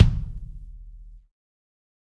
Kick Of God Wet 007
kit, pack, set, drum